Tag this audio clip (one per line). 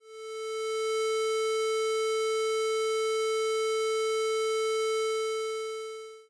Electronics
Technology